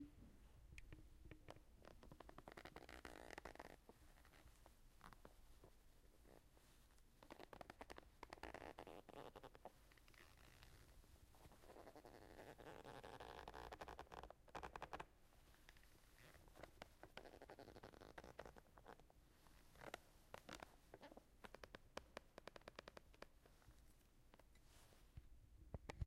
The name Obi Creak comes from how I recorded the sound. It came from my Obi (belt used in martial arts) when I twisted and turned my blade. Great for bowstring sounds or creaking stairs.